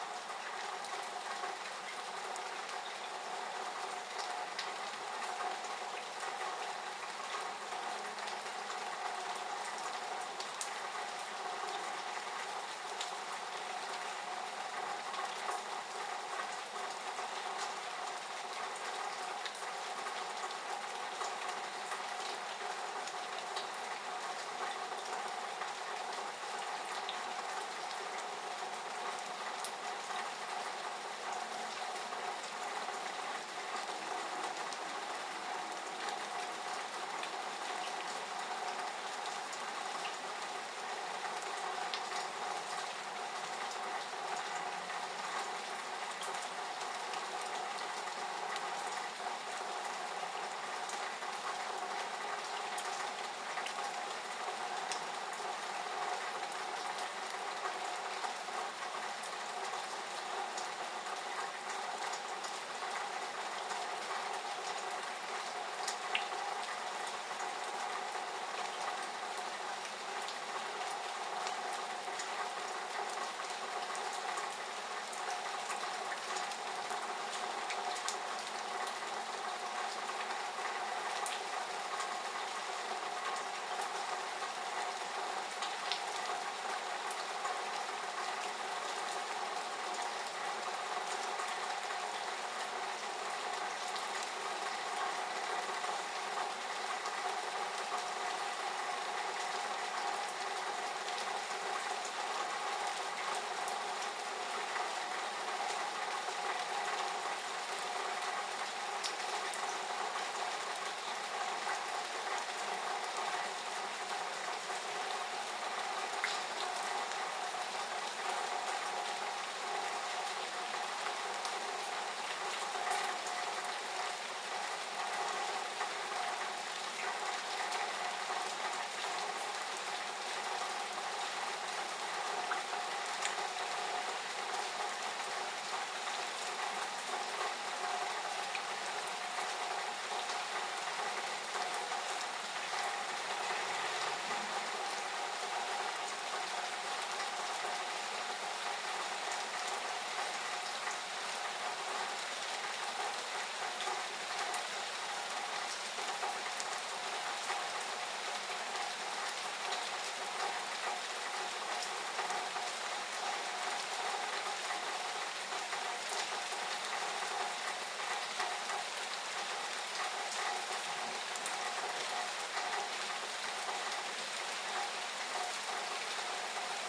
AMB M City Rain Spout
This is the sound of rain splattering in the spout outside my apartment building.
Recorded with: AT 4073a, Sound Devices 702t
metal, drips, rain, water, splatter, spout